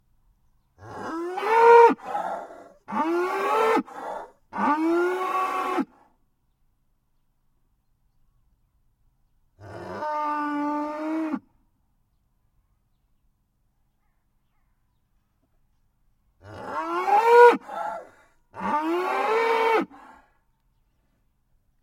Cow Scream
Cow mooing like crazy, recorded at Kuhhorst, Germany, with a Senheiser shotgun mic (sorry, didn't take a look at the model) and an H4N Zoom recorder.
mooing, scream, moo, cow